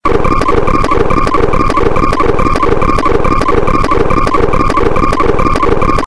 004 - ALIEN MACHINE II
A mix of loops, forming a machine pattern look liking an alien or a futurist vintage machine, like a submarine, the engine sector of a spaceship, a laboratory or a sci-fi generic sound.
Made in a samsung cell phone (S3 mini), using looper app, my voice and body noises.
abstract, alien, drone, effect, electronic, engine, future, futuristic, horror, lo-fi, loop, looper, machine, science, scientist, sci-fi, sfx, sound, space, spaceship, station, strange, submarine, time, travel, vintage, weird